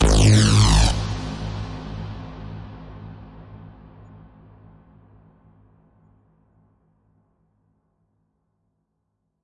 Oneshot Bass 2
Electronic, Synthesizer, 4x4-Records, BassDrops, Drums, House, J, Closed, Electric-Dance-Music, Sample, Bass, Dubstep, Snare, Lee, Loop, Beat, EDM, Drop, Off-Shot-Records, Kick, Drum